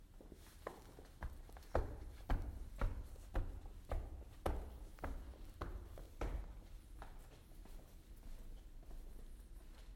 Part of a collection of sounds I recorded at an elementary school after the students have finished the year--the building was largely empty and as I've worked here, I've noticed a range of interesting sounds that I thought would be useful for folks working with video games or audio dramas!